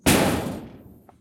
small-metal-hit-07
Metal rumbles, hits, and scraping sounds. Original sound was a shed door - all pieces of this pack were extracted from sound 264889 by EpicWizard.
scrape, metal, nails, ting, impact, rod, industry, factory, blacksmith, clang, shiny, lock, percussion, bell, rumble, iron, industrial, steel, hit, hammer, metallic, shield, pipe